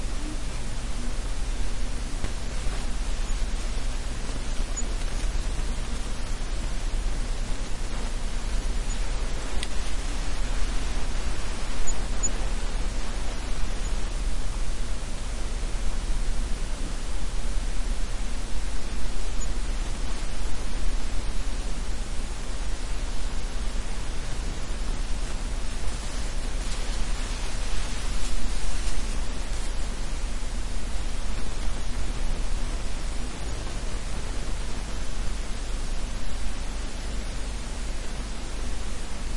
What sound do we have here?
microphone static
One-hit-wonder glitch that once happened on my microphone.